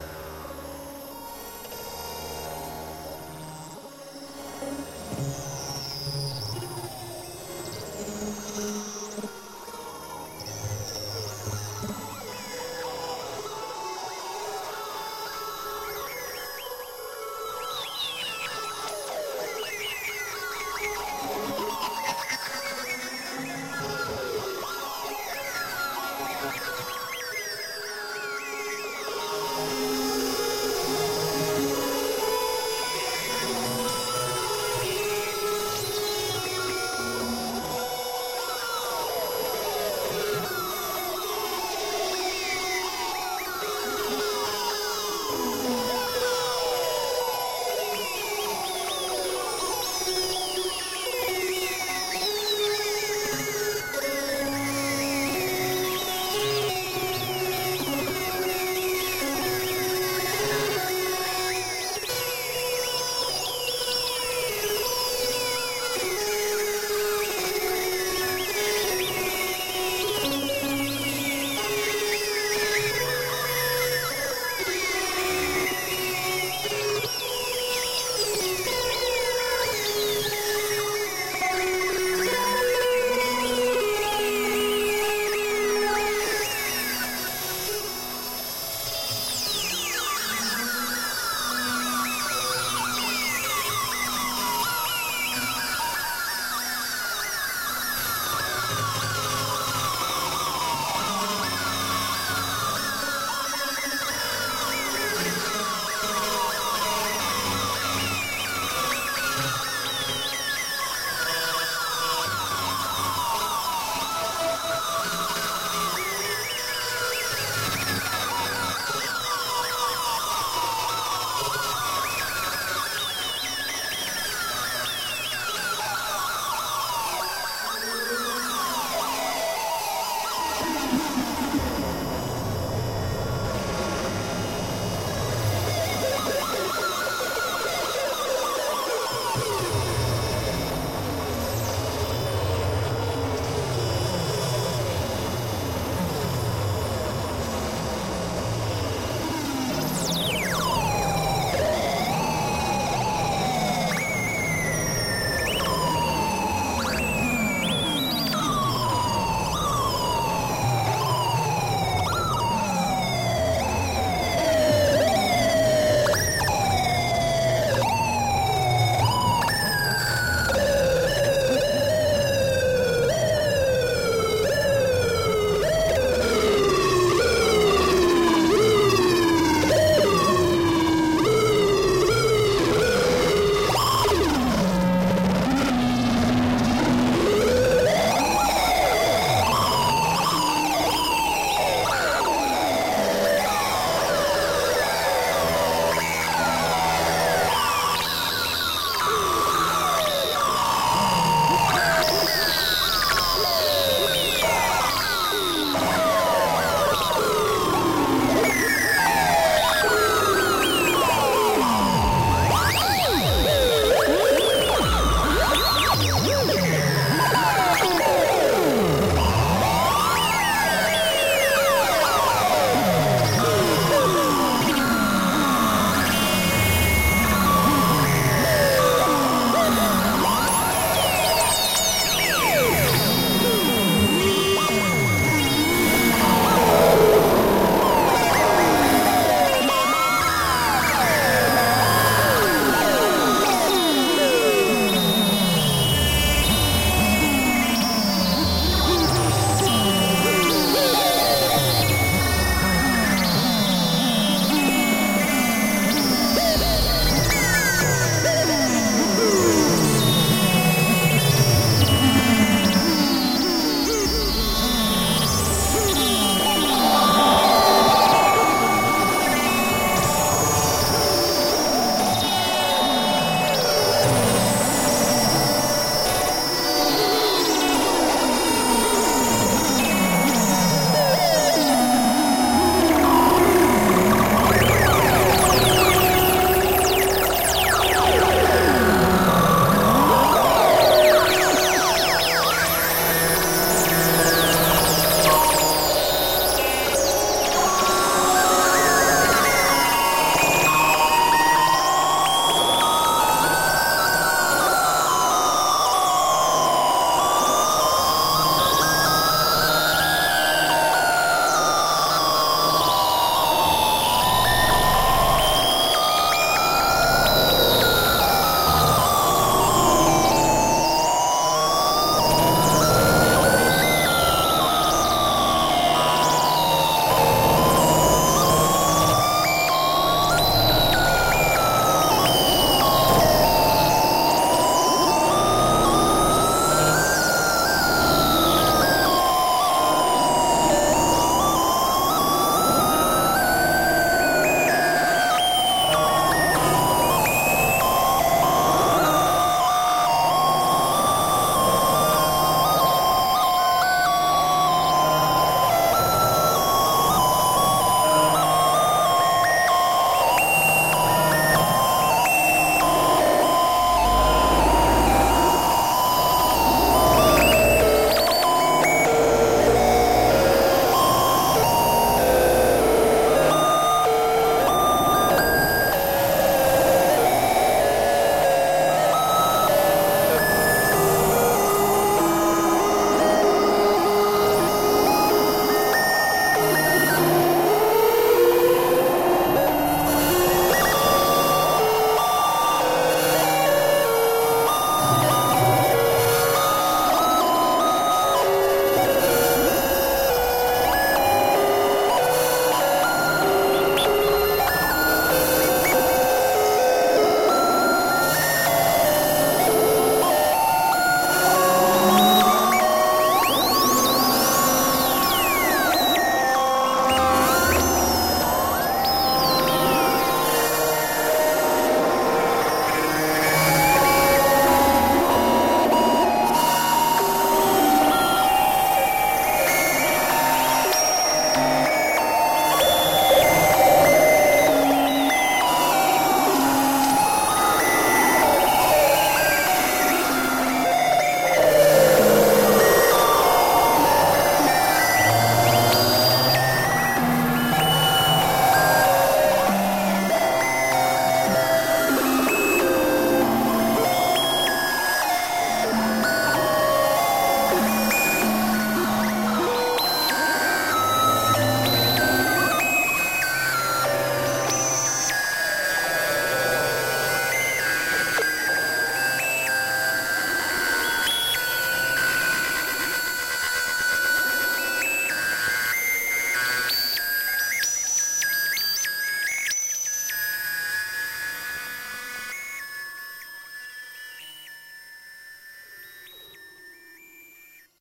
block box 4
using a frequency filter by setting its Oscillations in variable arrays I was able to make most of these sounds. Also vocoding and feedbacks and feed throughs were happening. then they were altered in audacity.